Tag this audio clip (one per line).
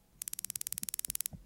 taser
pistol
shock
electric